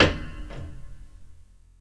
A piece of wood thrown into the piano, recorded with Tascam DP008.
Marteaux du piano frappant un vulgaire bout de bois placé dans les cordes du piano, capté par le brave Tascam DP008.
detuned, prepared